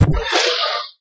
Alien laser rocket being launched.
alien, fi, fiction, launcher, rocket, sci, science, science-fiction, sci-fi